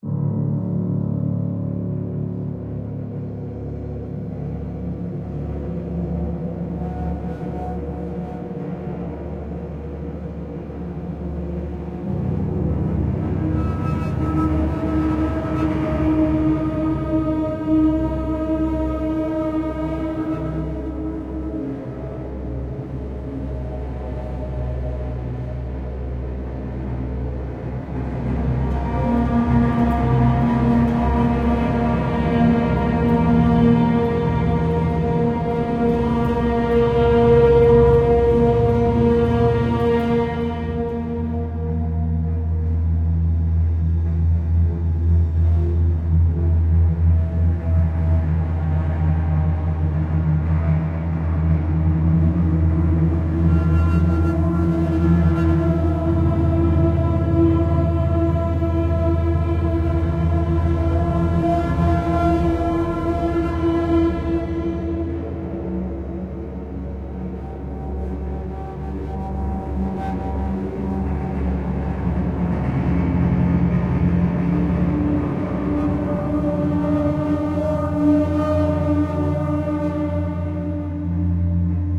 Dark Sad Mood Orchestra Synth Strings Drone Dramatic Thriller Cinematic Music Surround
Sound, Ambience, Pad, Cinematic, Horror, Surround, Drone